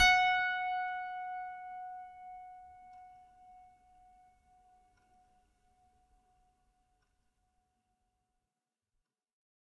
a multisample pack of piano strings played with a finger
fingered, piano, strings, multi